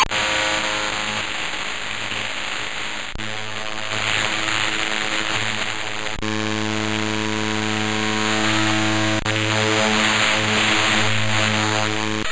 What do you get if you let a 2 bit computer process an electric guitar mixed with an old lawnmower? This sound!